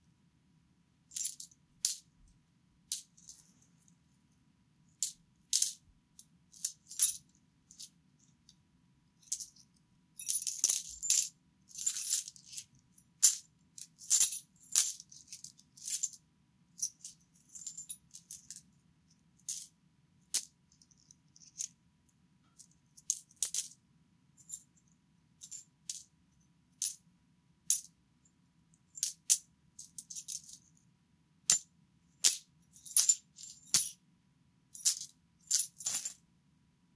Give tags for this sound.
pick interaction pick-up pickup clink small sfx coin coins

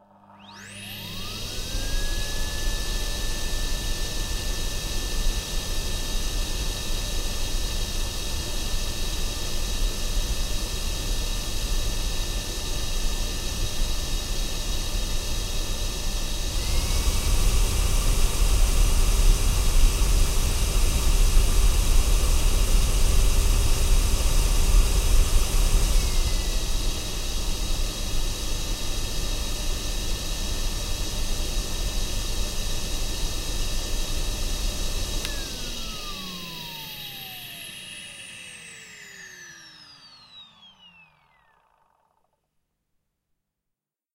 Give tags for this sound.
vacuum henry hoover cleaner

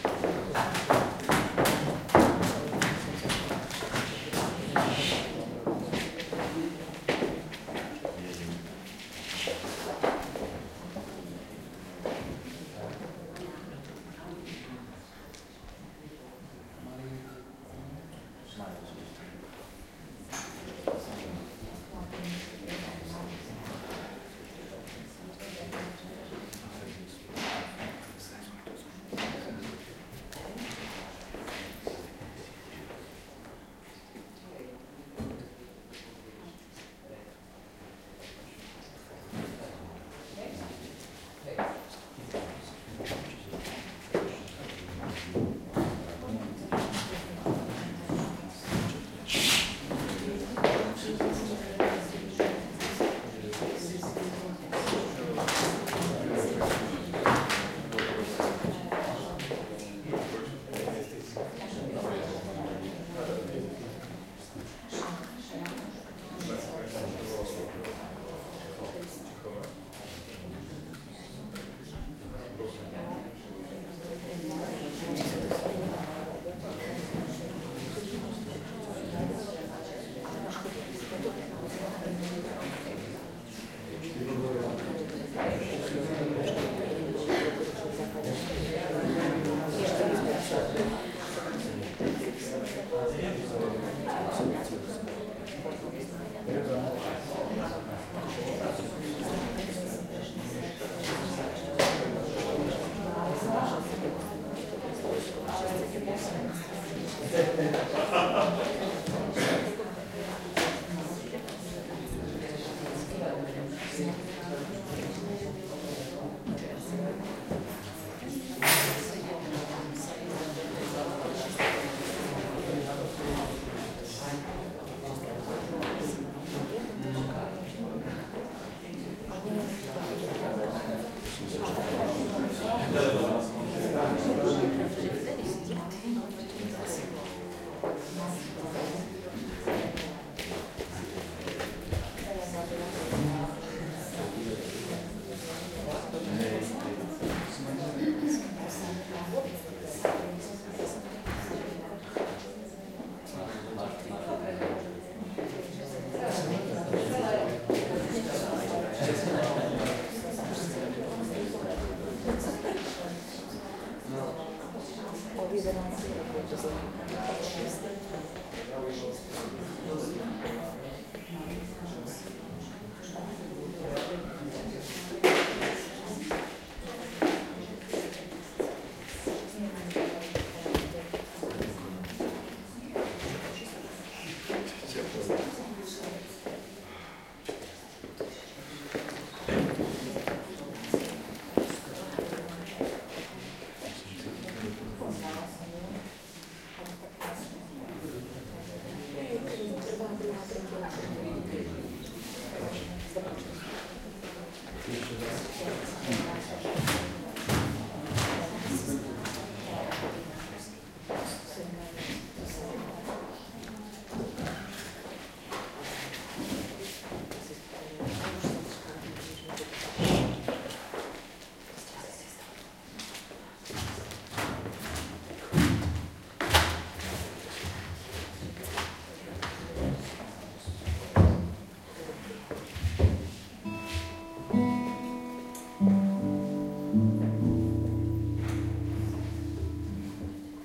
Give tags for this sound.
boy female girl guitar human noise people play prepare quiet room session slovak speak speech steps talk text tune vocal voice woman

